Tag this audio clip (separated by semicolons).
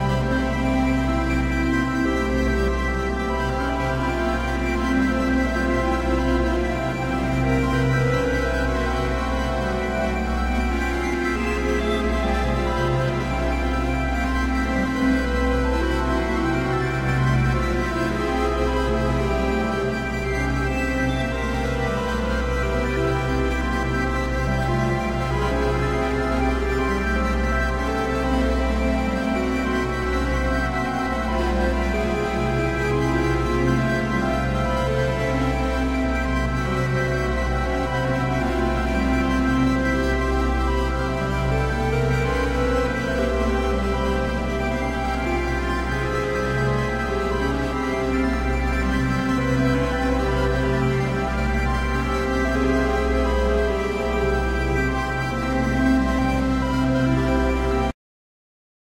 The; Space; Flower